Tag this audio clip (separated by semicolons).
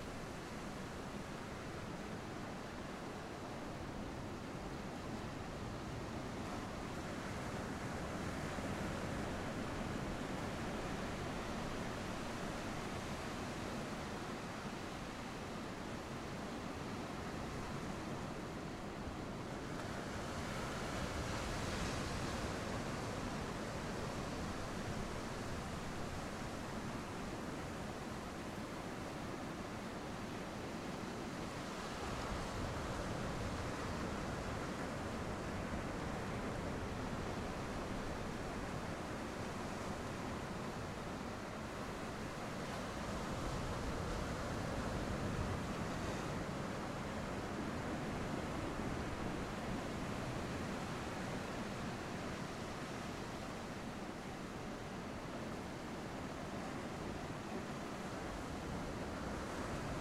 beach; coast; ocean